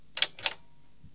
lift knop
A push button version 2
i have 2 versions